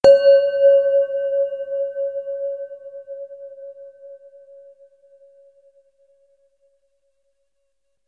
Synthetic Bell Sound. Note name and frequency in Hz are approx.
processed, synth